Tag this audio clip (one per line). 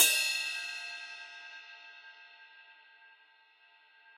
cymbal
velocity
1-shot